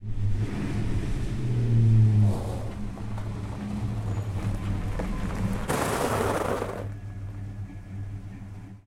1962 Ford Pickup Truck Approach and Stop. Dirt and Gravel crunch.